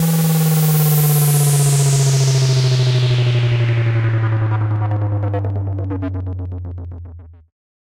Bassic Noise Sweep 2
sound-effect, fx, rising, sweeper, riser, sweeping, sweep, effect